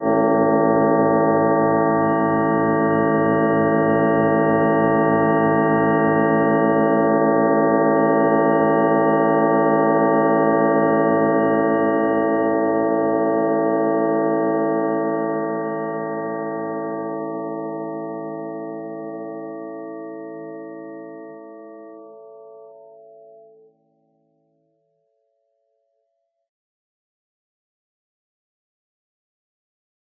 Ominous space organ sound created with coagula using original bitmap image.